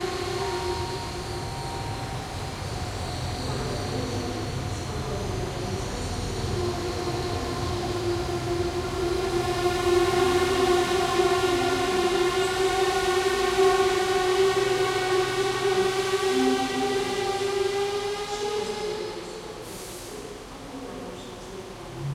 railway station 2
Krakow railway station ambience
rail, railway, railway-station